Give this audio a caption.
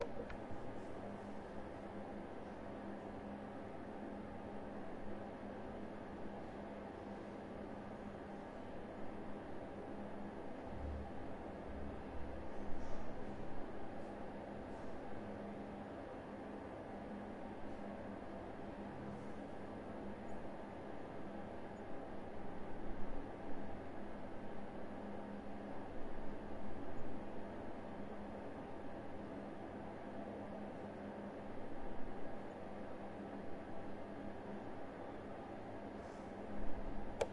Basement floor ambience. Air flow vent sound.
Recorded via Tascam Dr-100 mk II